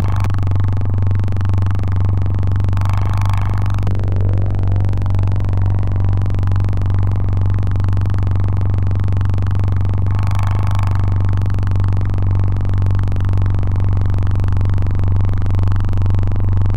9-tunnelling machine
"Interstellar Trip to Cygnus X-1"
Sample pack made entirely with the "Complex Synthesizer" which is programmed in Puredata
experimental, modular, idm, ambient, pd, rare, puredata, analog